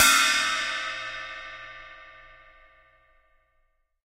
Mini China 06
cymbal cymbals drums one-shot bowed percussion metal drum sample sabian splash ride china crash meinl paiste bell zildjian special hit sound groove beat
meinl, paiste, beat, hit, metal, sabian, sample, splash, china, crash, cymbal, groove, drum, special, bell, mini, bowed, cymbals, zildjian, drums, percussion, one-shot